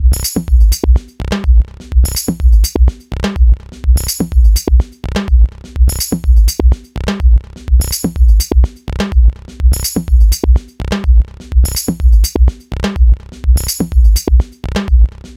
shot sound 1-Audio
ambient, effect, minimal, musical, sound, sound-design, tech